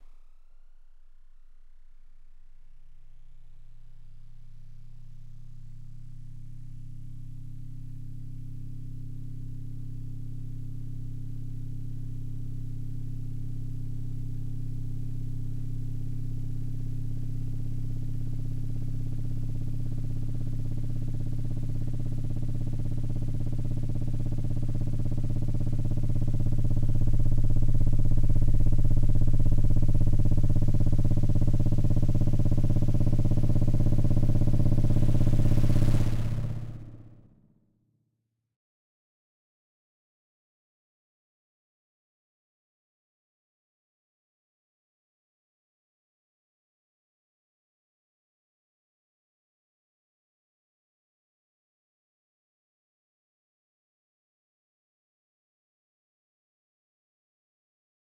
long sweep up fx 3
long sweep up fx usefull for film music or sound design. Made with the synth Massive, processed in ableton live.
Enjoy my little fellows